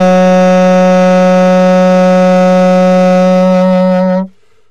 Alto Sax gb2 v115
The first of a series of saxophone samples. The format is ready to use in sampletank but obviously can be imported to other samplers. I called it "free jazz" because some notes are out of tune and edgy in contrast to the others. The collection includes multiple articulations for a realistic performance.
alto-sax, vst, sampled-instruments, saxophone, sax